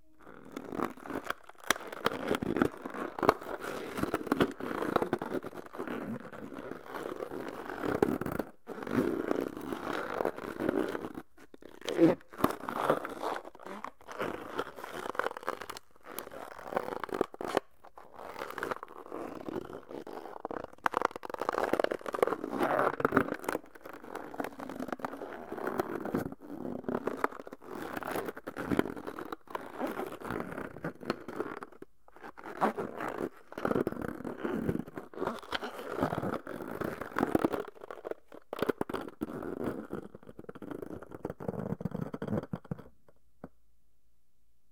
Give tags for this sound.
balloon
creaking
rubber
squeak
squeeky
stressing

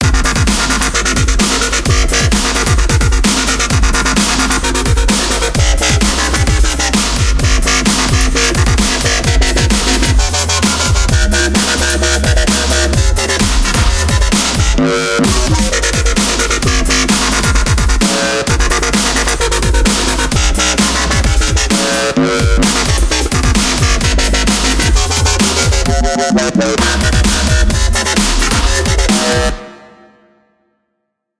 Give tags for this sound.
drum
snare
chimes
wobble
fruityloops
pad
synth
kick
string
dubstep
bass

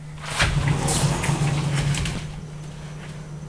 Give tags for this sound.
bedroom
door
foley
glass
noises
open
sliding

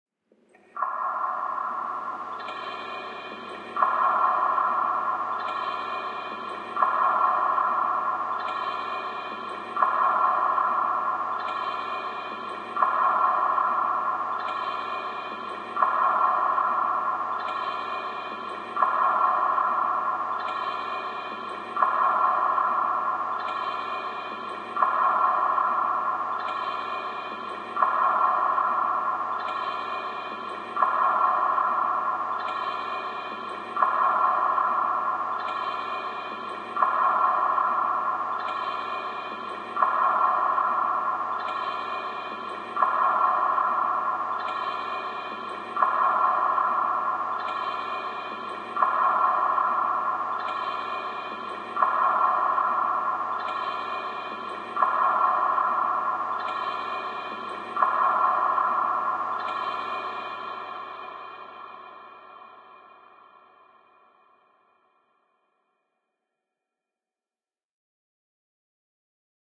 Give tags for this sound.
convolution
loop
space
cave
reverb
soundscape
water
unreal
reverbrant
drop

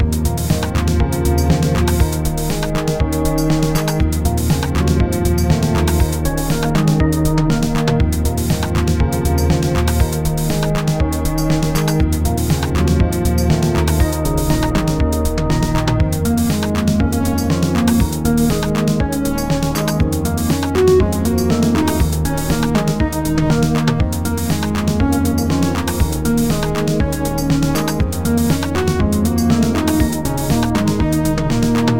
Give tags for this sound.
organ
tune
games
loop
synth
melody
game
gameloop
music
sound

